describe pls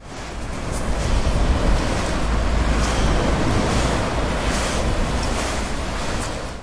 steps at the beach
This is sound is produced by a person walking on the sand of the beach.
It has been recorded using a zoom H2.
barcelona, beach, steps, UPF-CS14, waves, wind